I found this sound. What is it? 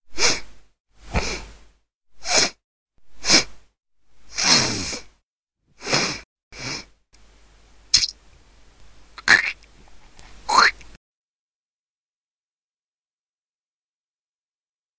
Random noises
Recorded a few sounds of myself making strange noises for you lot to put to good use perhaps.
breath, clicks, human, odd, sniff, snorts, sounds, weird